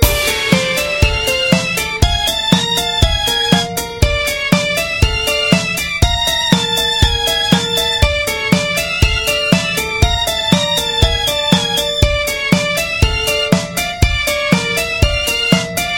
Loop Little Big Adventure 05
A music loop to be used in fast paced games with tons of action for creating an adrenaline rush and somewhat adaptive musical experience.
battle, game, gamedev, gamedeveloping, games, gaming, indiedev, indiegamedev, loop, music, music-loop, victory, videogame, Video-Game, videogames, war